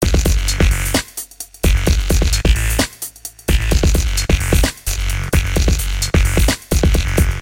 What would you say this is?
now beat synth 3
These sounds are from a new pack ive started of tracks i've worked on in 2015.
From dubstep to electro swing, full sounds or just synths and beats alone.
Have fun,
Minimal, Dance, beat, Dj-Xin, Bass, Xin, Sample, House, Electro-funk, Drums